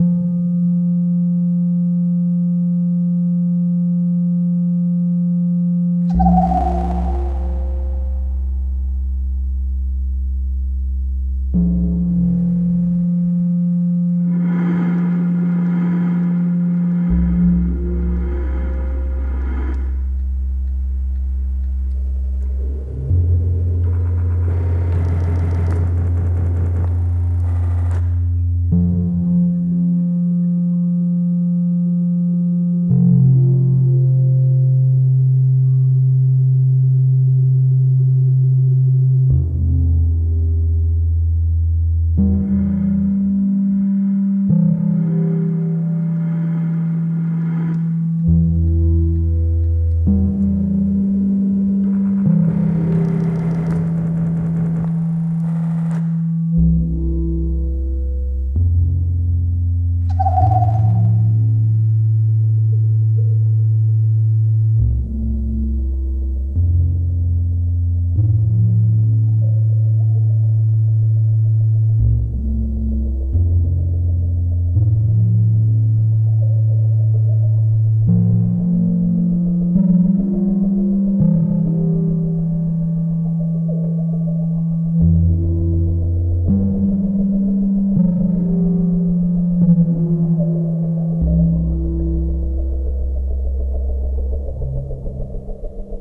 Ambient Jam 1
Ambient synth drone
ambient, drone, synth